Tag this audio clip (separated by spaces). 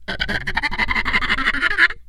idiophone friction daxophone instrument wood